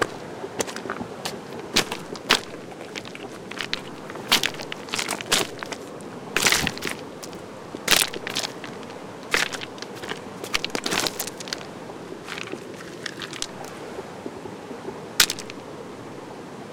Rock walking river
Recorded at Eagle Creek trail on Tascam HDP2 using a Sterling Audio ST31 microphone.
field-recording, Nature, oregon, stream, waterfall